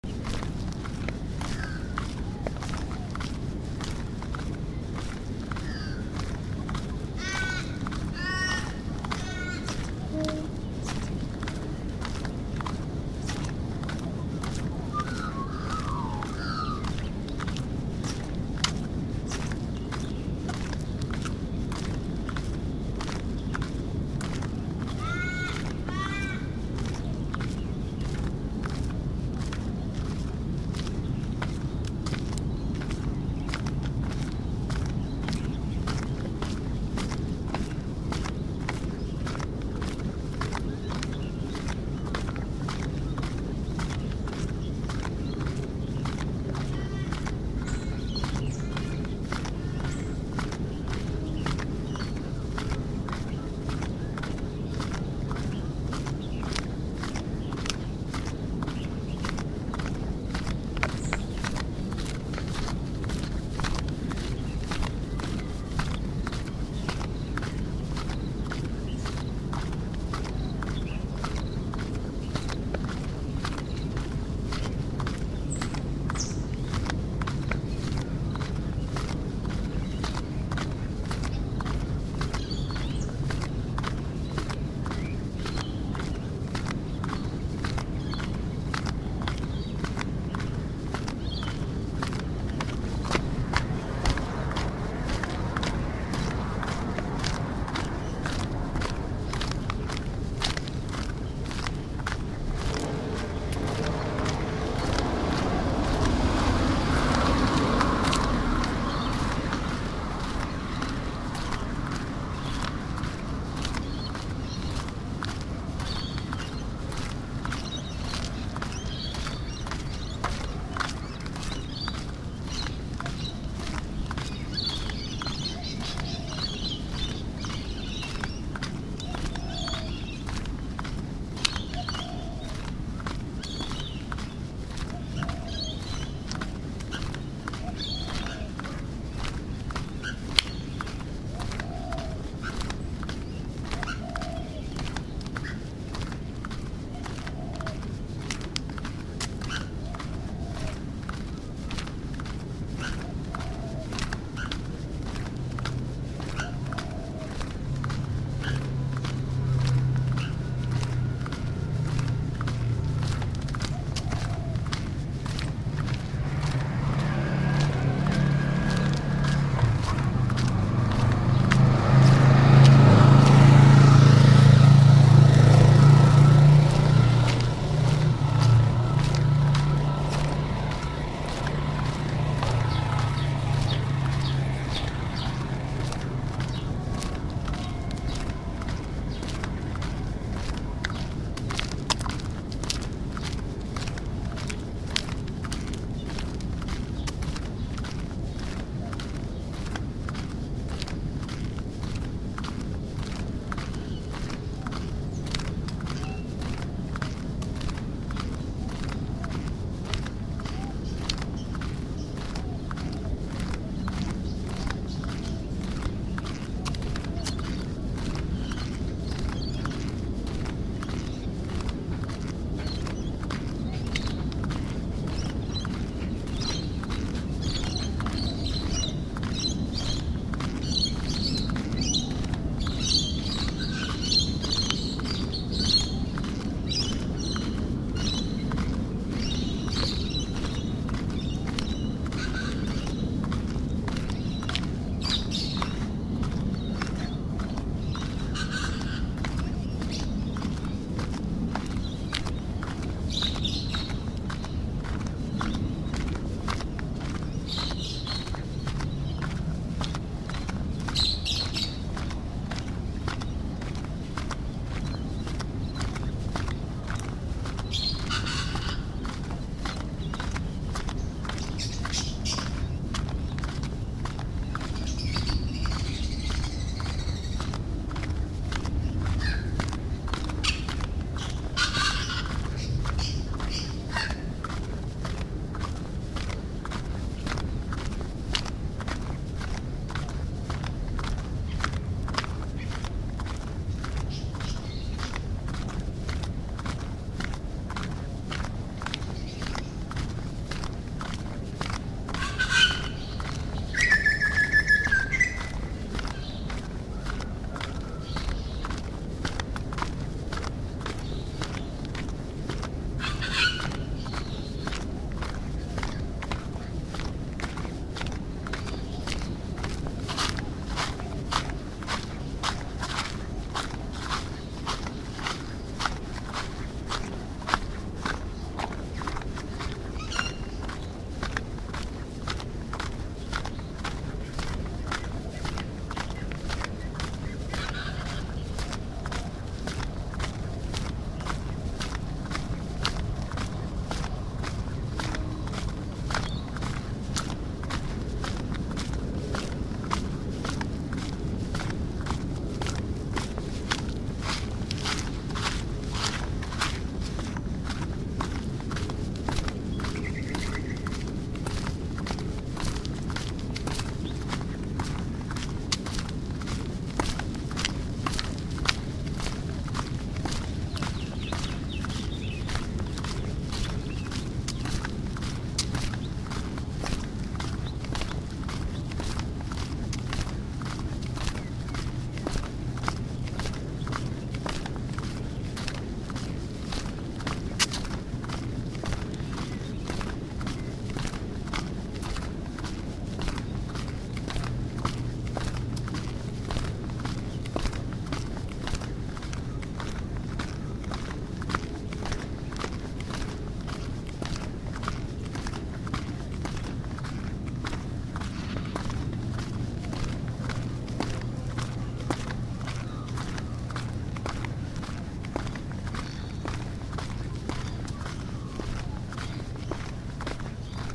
Coniston30Jan2016
Walking through the Suburb of Coniston in the City of Wollongong, Australia, early one morning...
nature, field-recording